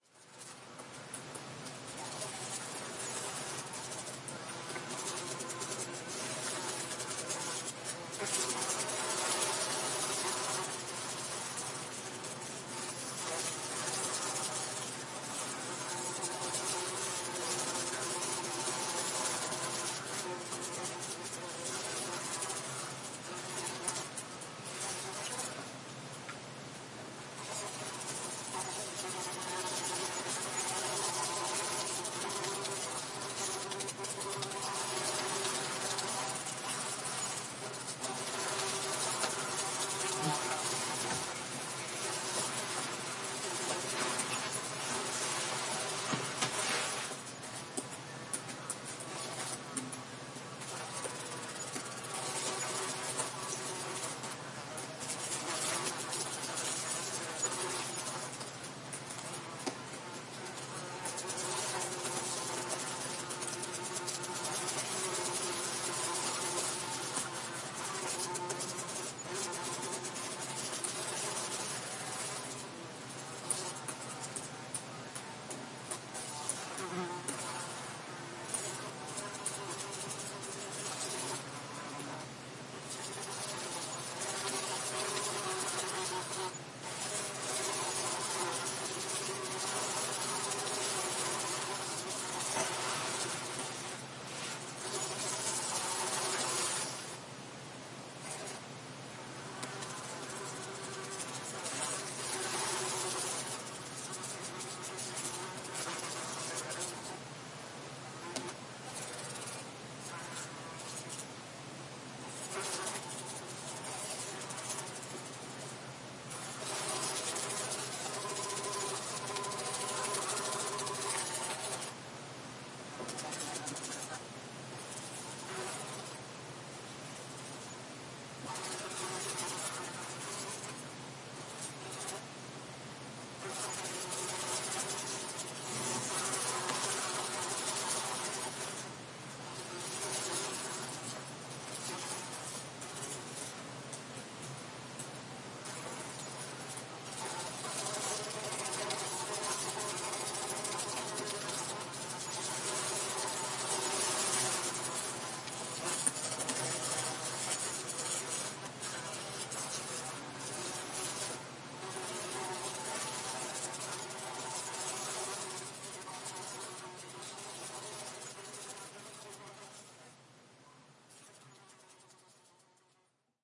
Small Busy Swarm of Flies
recorded on a Sony PCM D50